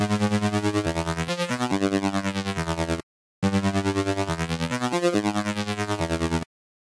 Dubstep synth to accompany bass wobble in this pack. Oscillates every beat at 140bpm.